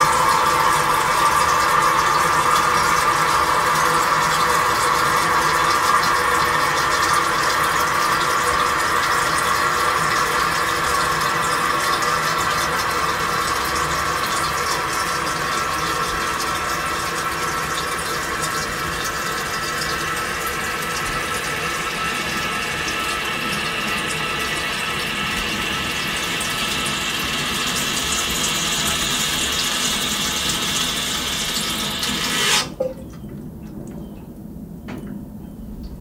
A toilet tank filling with water. Recorded with a Sennheiser MKH-416 and a SounddDevices USB Pre2